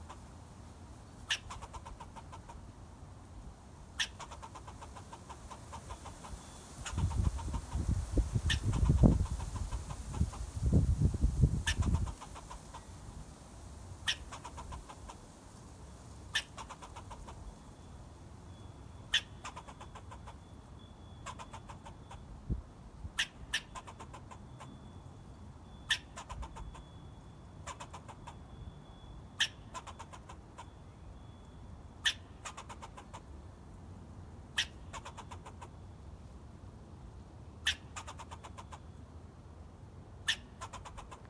Squirrel chirping
A fox squirrel perched on tree branch chirping. Rapid City, South Dakota.